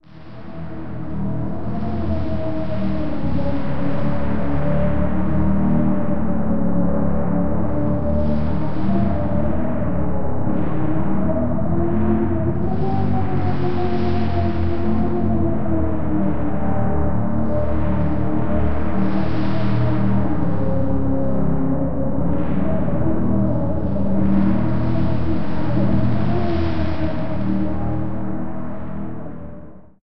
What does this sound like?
original sound is taken from a well known analog synth and is heavily processed with granular-fx, bit-reduction, reverb, filtering, pitch-shifting and other effects...